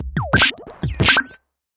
weird 3 loop180
A weird glitchy, bleepy loop, made on FL studio.
loop, bleeps, weird, glitch, cuts, 180bpm